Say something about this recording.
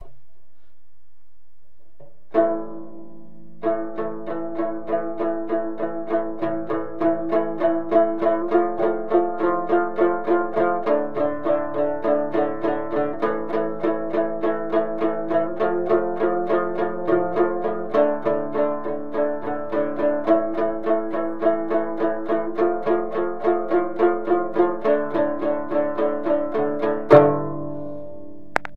Dramyin Drive
The Dramyin is a classical Tibetan Instrument. This is small Dramyin Sequence written by me.
Classical, Acoustic, Dramyin